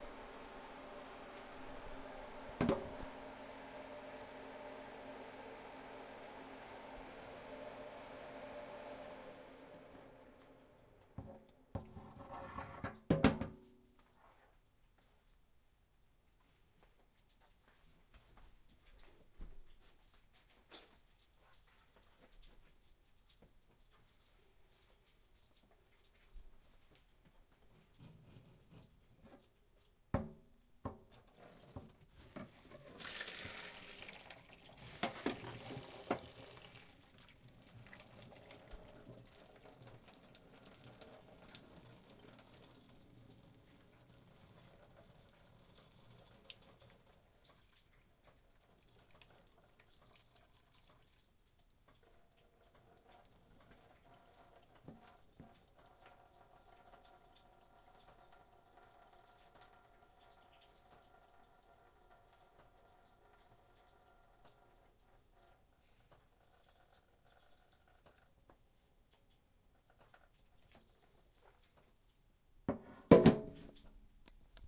Cutting onion and garlic for spaghetti.
dinner, cutting-garlic, cutting-onion